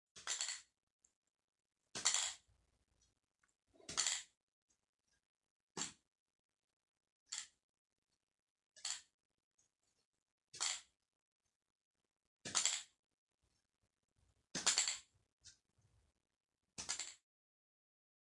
42. Agarrar aerosol
spray, catch, pick
catch, pick